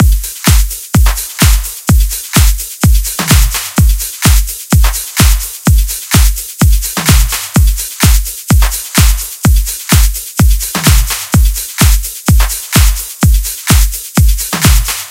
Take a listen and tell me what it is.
A high quality, classic 128 bpm (or tempo) beat for club dance/house/EDM/trance/techno songs. Made in LMMS.